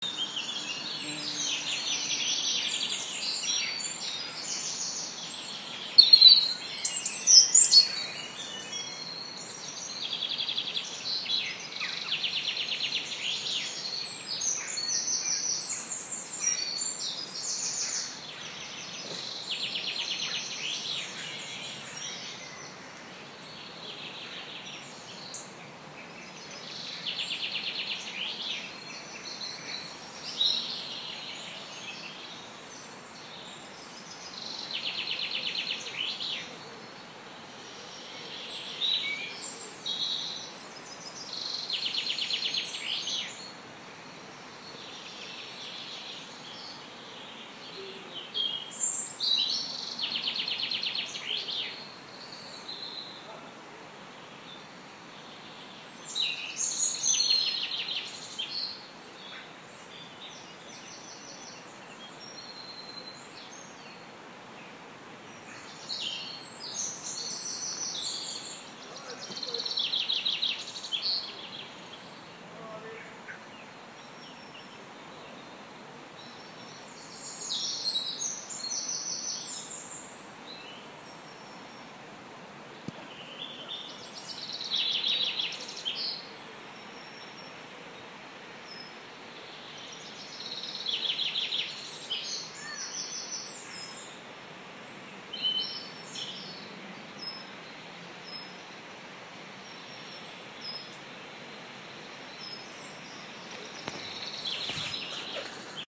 Birdsong hermitage of braid
A recording of birdsong in a secluded area of Edinburgh, Scotland. A heavily wooded area with a burn (stream) running through it. Recorded on an iPhone 5s
birdsong, spring, field-recording, forest, nature, bird